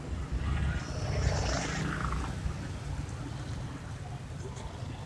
Registro de paisaje sonoro para el proyecto SIAS UAN en la ciudad de Palmira.
registro realizado como Toma No 07-ambiente 3 parque de los bomberos.
Registro realizado por Juan Carlos Floyd Llanos con un Iphone 6 entre las 11:30 am y 12:00m el dia 21 de noviembre de 2.019
Of, Toma, Sonoro, Proyect, SIAS